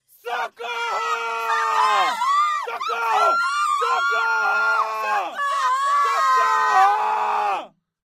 Cry for help- Collective
Group of people screaming for help.